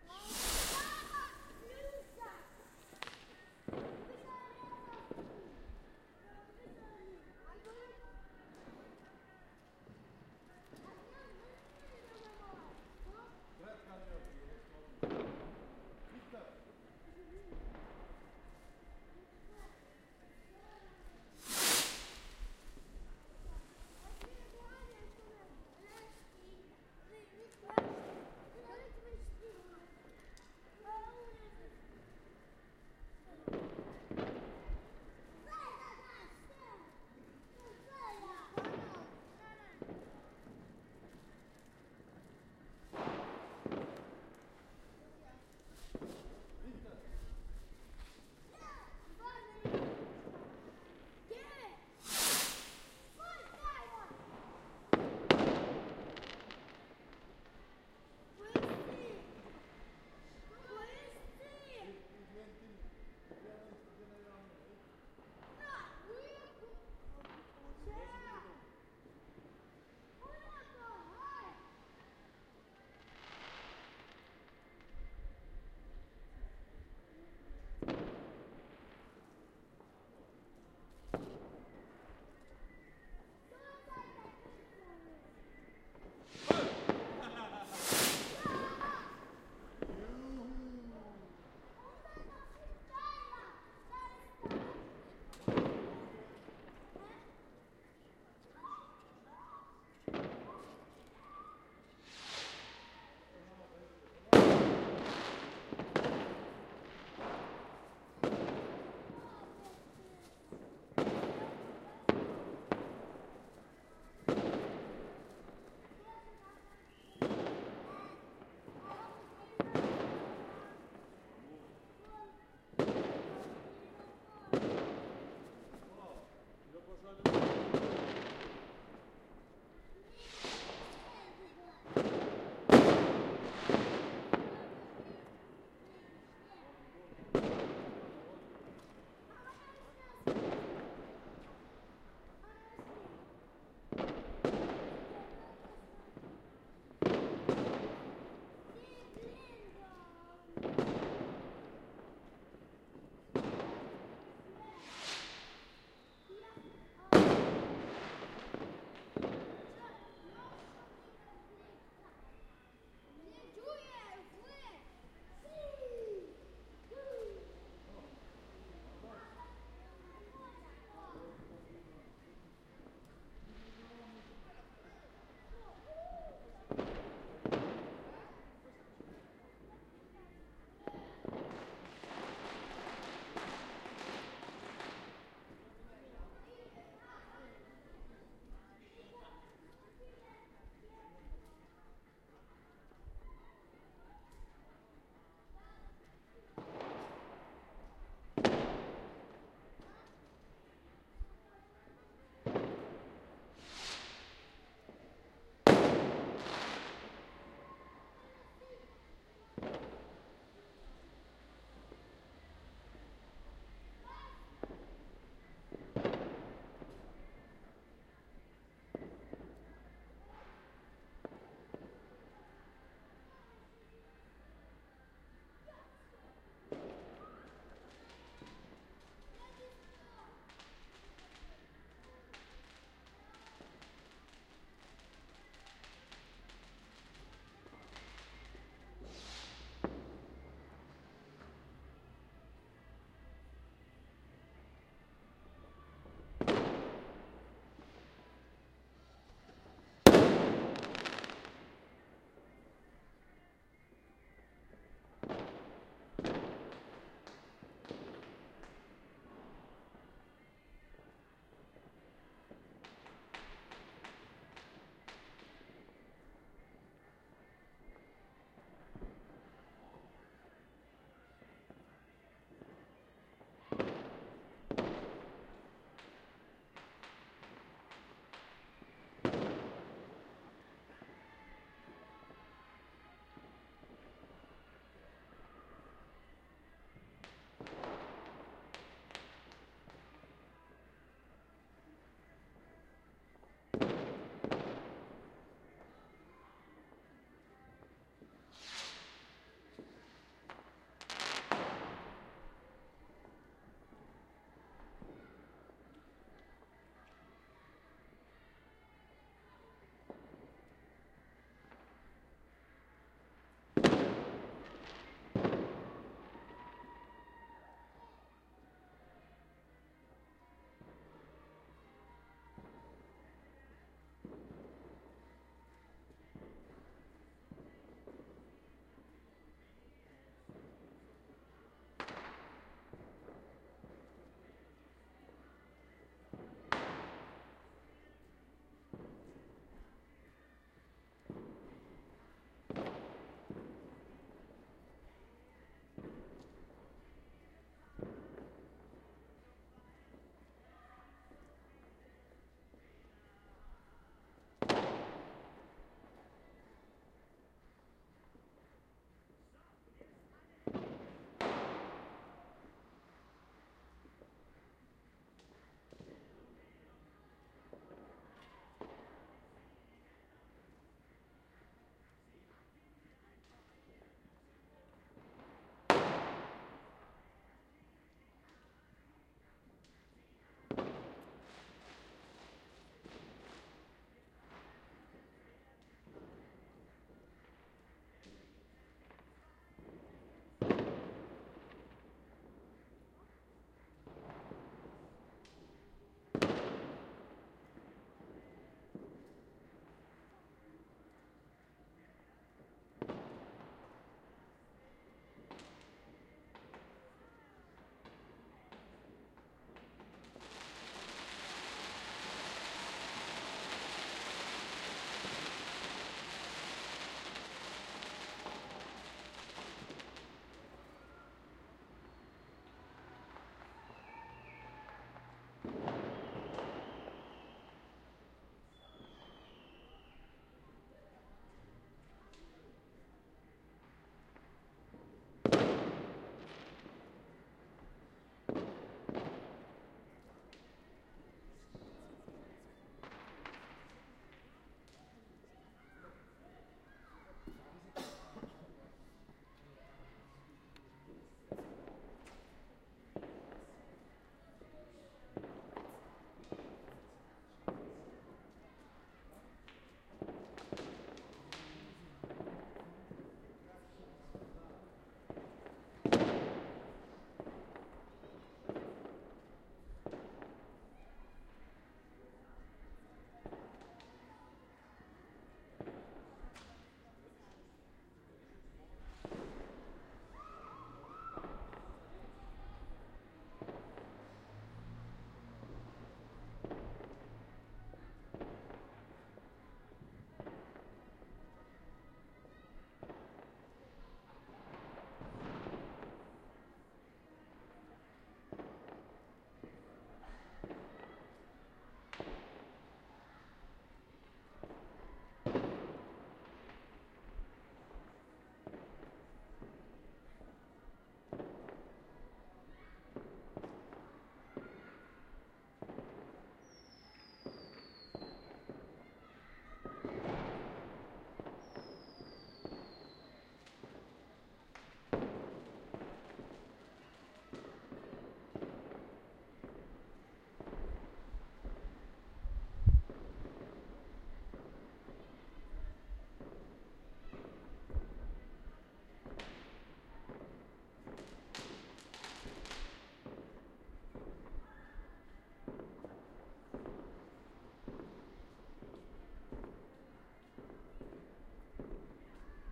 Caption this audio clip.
New Year's Eve 2010 2011
2010, 2011, eve, new, years